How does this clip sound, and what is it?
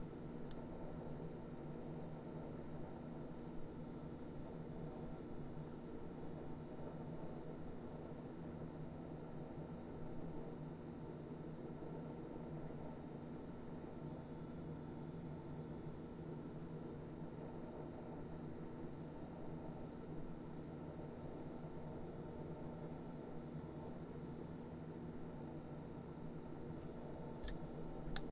Fridge compressor quietly humming.
recorded with zoom h4n unprocessed
ambient, appliance, background, compressor, fridge, hum, kitchen, noise, refrigerator